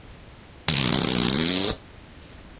nice fart 1
flatulation, weird, fart, noise, poot, gas, flatulence